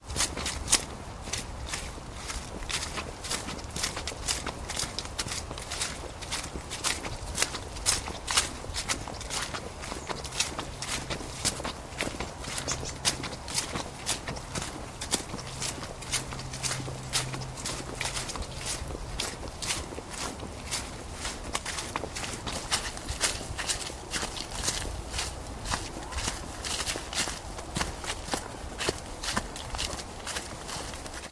Footsteps, Light Mud, A
Raw audio of footsteps in some semi-watery mud, not nearly as squidgy or moist as the 'Footsteps, Muddy', but still partially squidgy.
An example of how you might credit is by putting this in the description/credits:
foot, footstep, footsteps, light, mud, muddy, squidgy, step, steps